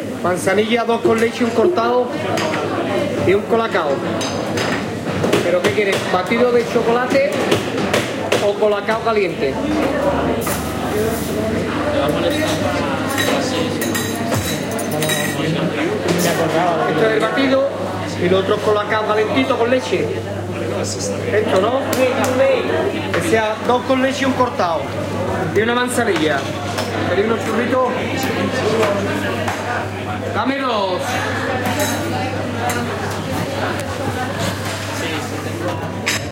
20091217.22.breakfast.bar
typical noisy bar ambiance at breakfast time, voices speaking in Spanish. noise of store blinds being raised manually. Recorded in Seville (Plaza de la Encarnacion) during the filming of the documentary 'El caracol y el laberinto' (The Snail and the labyrinth), directed by Wilson Osorio for Minimal Films. Olympus LS10 recorder internal mics
bar, voice